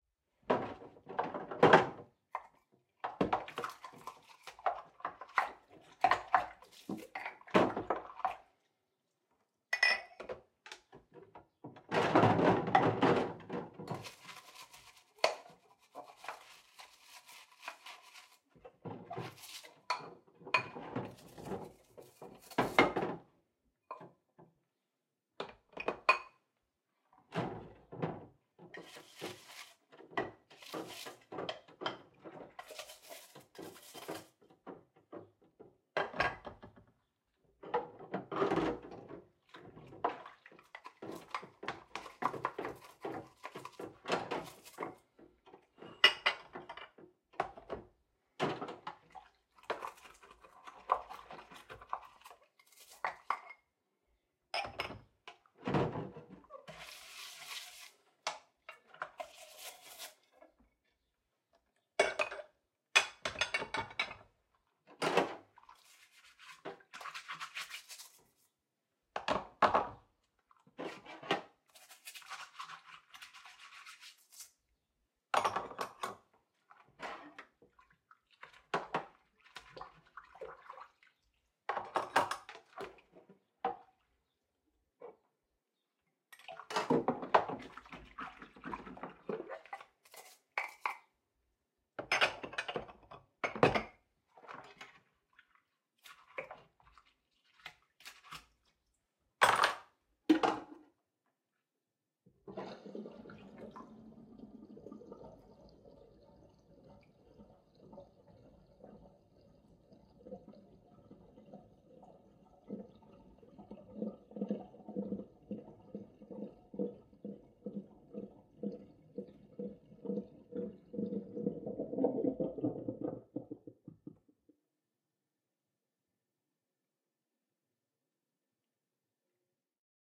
Doing dishes
Doing the dishes.
Recorded with a Sennheiser MKE 600 shotgun mic, an Mbox II and Macbook.